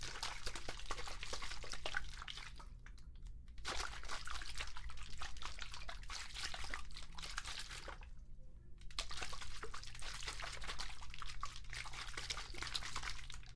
This is a foley of water splashing done with a thermo filled with water, this foley is for a college project.
water, drip, splash